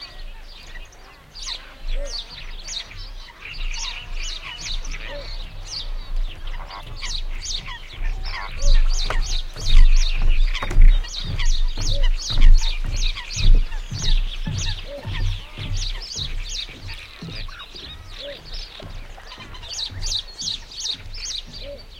low sound of footsteps on a wooden catwalk, with bird (Warbler, Sparrow, Coot, Starling) calls in background. If you pay attention you'll notice passers-by are bird watchers (ha ha)